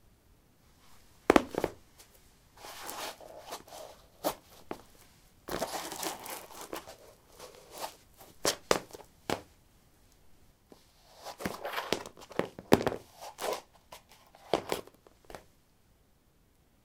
lino 09d highheels onoff
Putting high heels on/off on linoleum. Recorded with a ZOOM H2 in a basement of a house, normalized with Audacity.